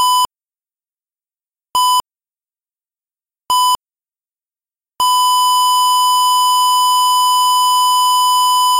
A sound similar to a flatline. Tones and silence generated in Audacity.